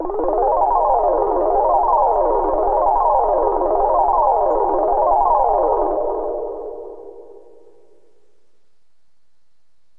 A lo fi arpeggio loop with reverb and delay.
fantasy, lo-fi, arpeggio